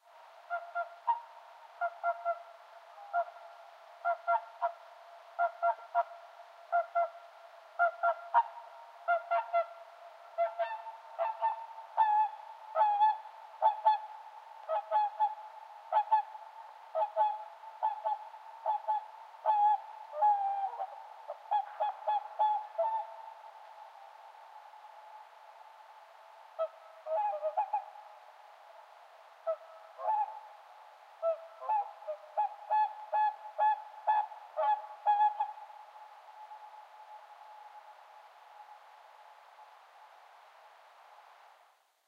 Swan at lake late at night
A whooper swan (Cygnus Cygnus) recorded autumn 2008 at Ojajärvi lake (Alajärvi, Finland) near midnight. It was dark, I did not actually see the swan, but it was right in front of me approximately 20-30 meters away. In the recording it is flying some 30-40 meters aside and then landing again on the water (you can hear a small "swoosh" in the end, just before the final notes).
There was no wind, so there is a nice echo. No extra echo added, there is only some eq, compression and noise reduction to make it as clear as possible and give you a glue of what a swan on a calm lake sounds like. (One can never quite catch it, you know.)
birds, birdsong, field-recording, lake, swan